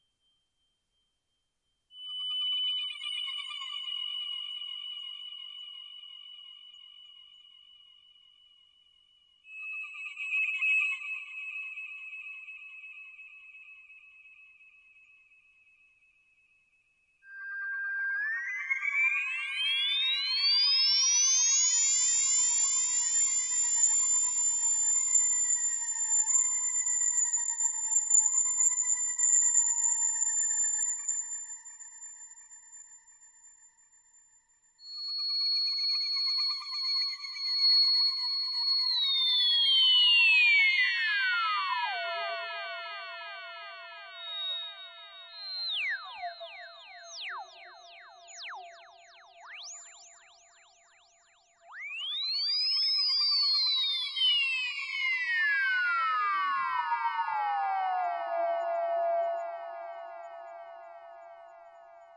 Drones and sequences made by using DSI Tetra and Marantz recorder.
Tetra, Analog, Sequencer